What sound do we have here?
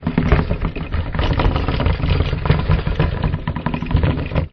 Hitting Stairs Suitcase 02
long version of dragging the suitcase. Can be used for animation, movie or anything related to suitcases or dragging stuff.
Thank you for the effort.
metal, hit, hitting, case, stair, dragging, metallic, effect, stairs, drag, suitcase